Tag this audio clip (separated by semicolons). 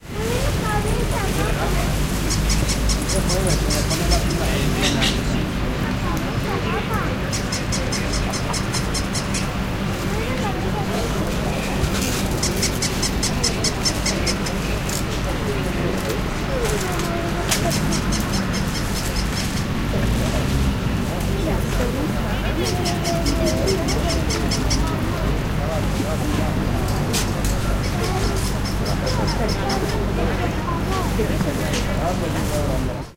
dog; sonsstandreu; toy